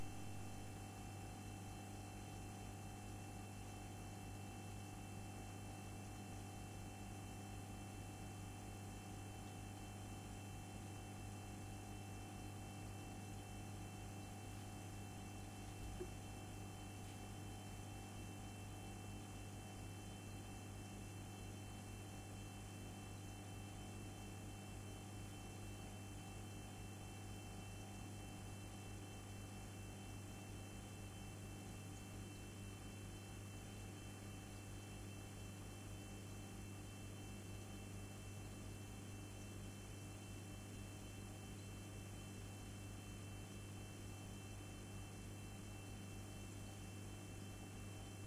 Recording of fridge tone. Recorded using a Neumann KM185, Oktava MK012 and a Sound Devices 552.